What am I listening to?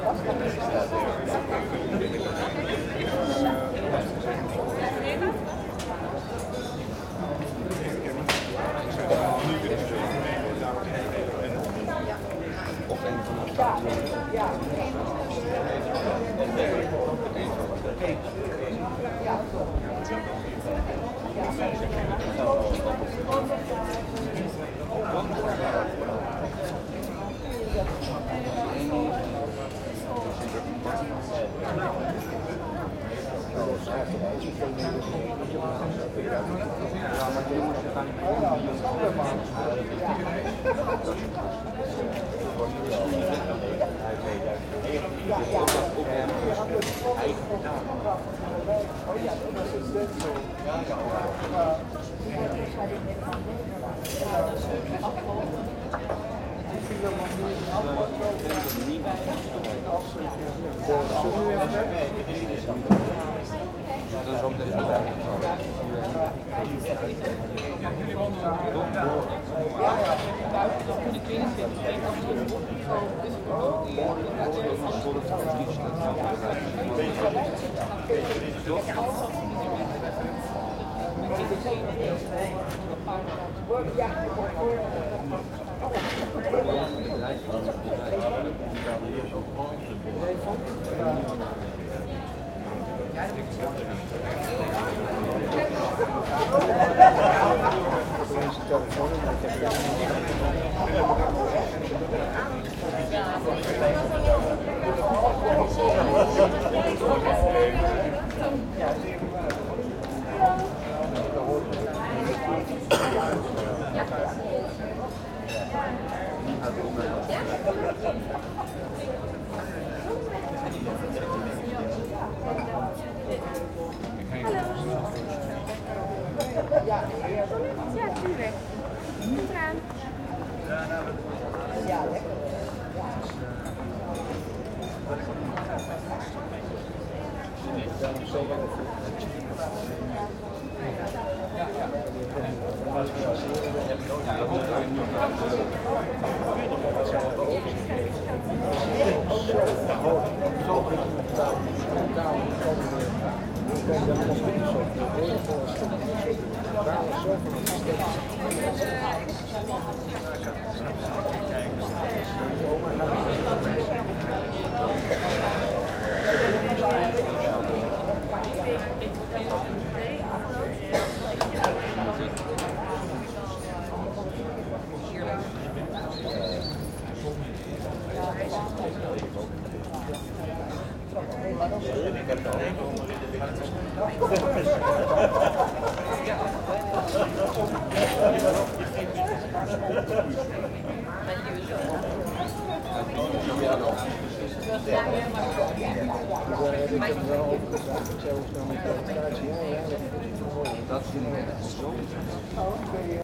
walla hum of voices, cafe ext, people talking3. Amsterdam, Nes EG14
Recording near a cafe with people outside, recorded with a Sony PCM D-50 in 2014
Amsterdam, cafe-ext, hum-of-voices, Netherlands, people, talking, voices, walla